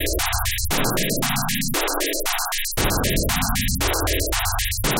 Percussive rhythm elements created with image synth and graphic patterns.
element, image, loop, percussion, soundscape, synth